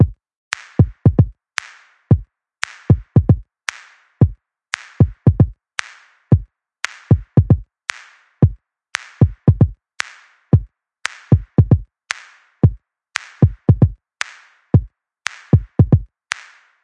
114-finger-beat
fingersnap beat 114bpm